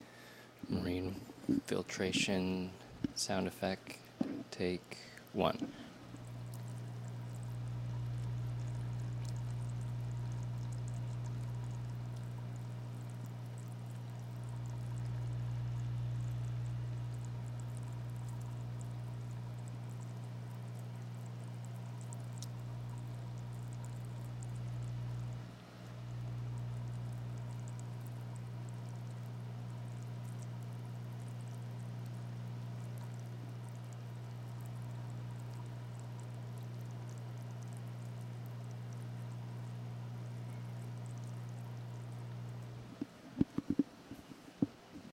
Marine filter
10 gallon aquarium over-back water filter with sound of water, NTG-2, Tascam-DR60D